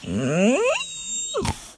My dog Fangsie yawning